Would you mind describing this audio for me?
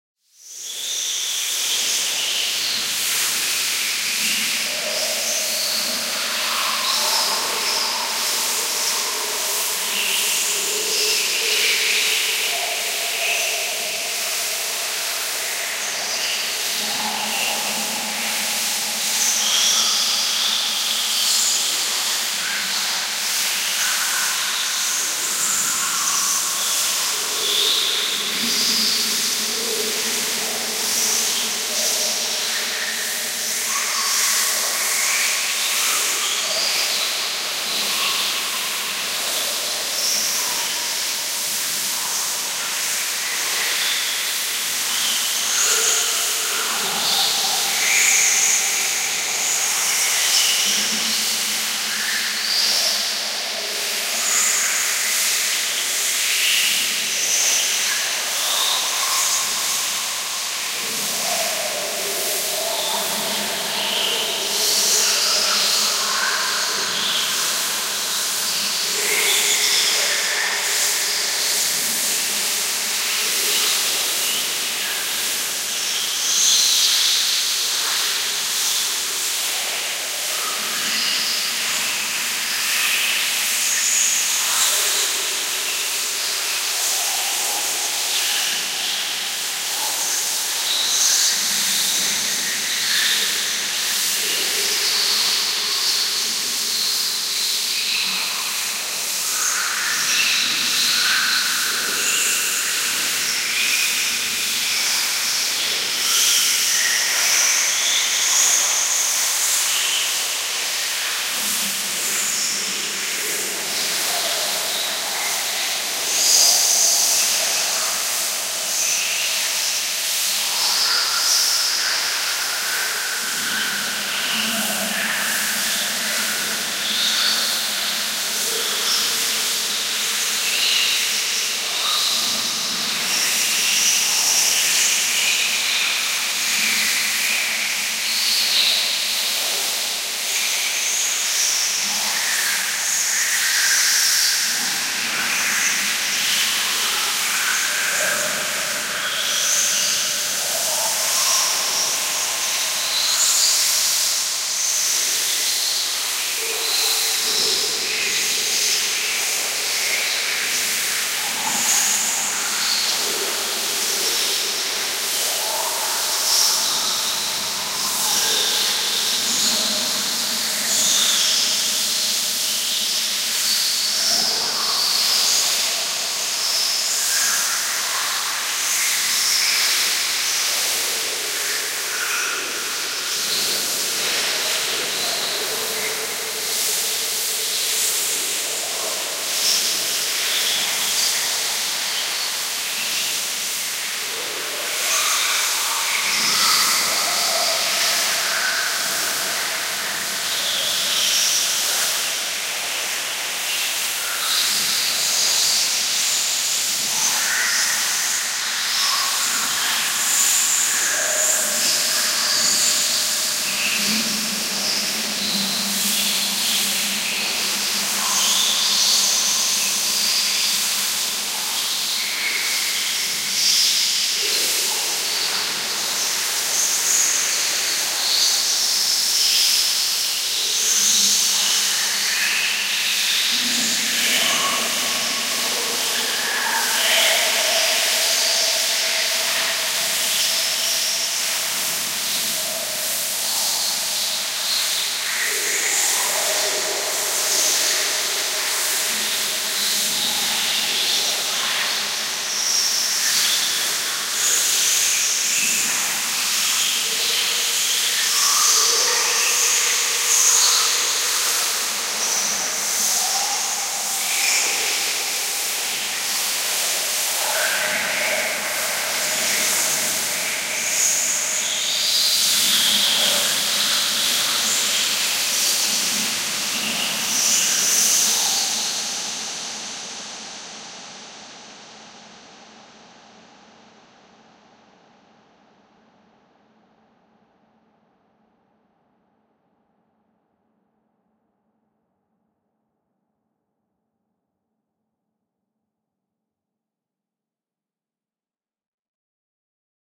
This sample is part of the "Space Drone 1" sample pack. 5 minutes of pure ambient space drone. Busy space lake.